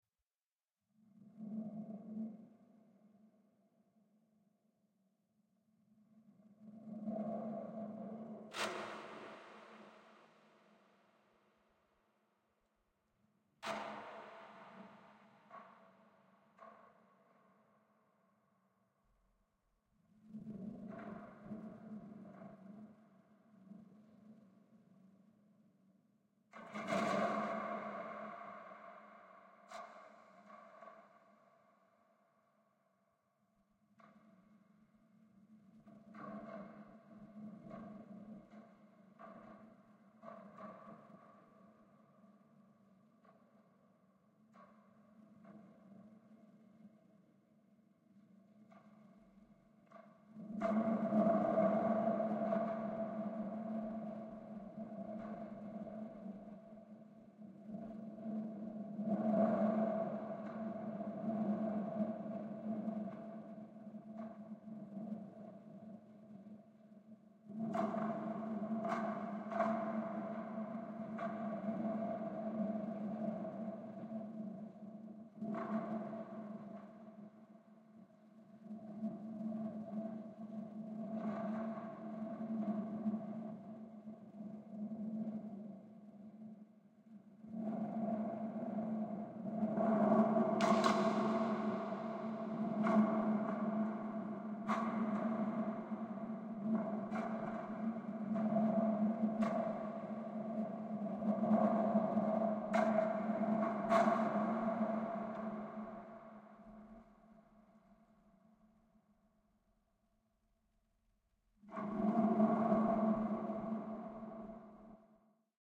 DeLisa rain tube robot mod 01
This is a stereo recording of a rain tube, Foleyed by DeLisa M. White. Oktava 012 microphone XY pair into Neve 1272 preamps and Apogee Mini-Me converter.
foley, robot